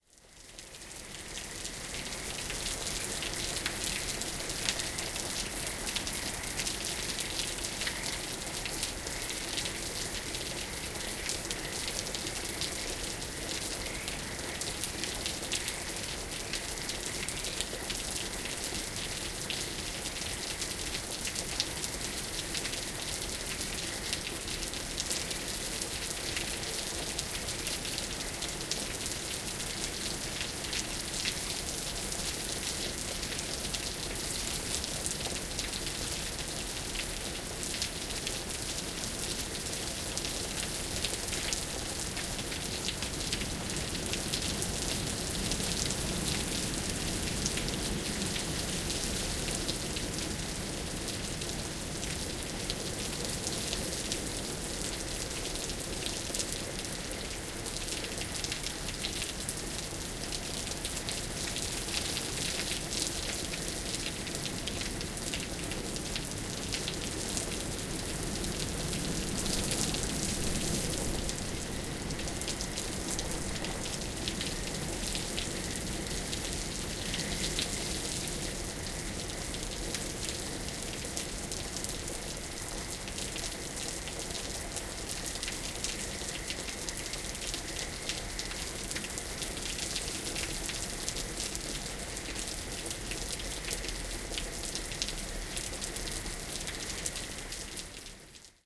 Rain and frogs 3
As the wind changes, frogs in the pond become quiet, then resume singing. The acoustics of the rain changes throughout the recording as the wind blows it in different directions.
california, field-recording, frogs, nature, night, rain, spring